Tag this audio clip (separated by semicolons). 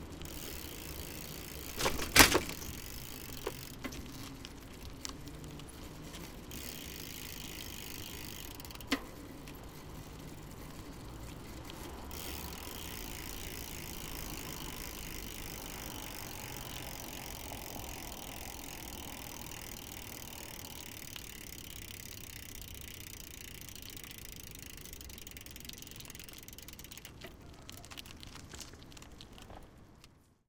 berlin; bicycle; bike; city; cycling; fahrrad; metal; night; shaking; shaky; vehicle